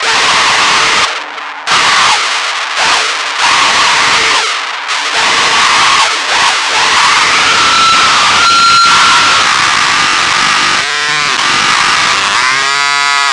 noise mic
Why does everything I upload sound like people screaming and/or chainsaws!?
Sound source is a contact mic producing feedback into various fx. The main fx used for the clip are a Sirkut Electronics Gnarler, Boss Metal Zone, DOD Grunge, and finally, a Danelectro French Fries Auto Wah. Recorded direct-to-sound card as usual. This is a sample taken from a longer piece.
chainsaw, contact, distortion, feedback, harsh, male, mic, noise, scream